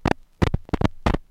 Various clicks and pops recorded from a single LP record. I carved into the surface of the record with my keys, and then recorded the needle hitting the scratches.
analog, glitch, noise, record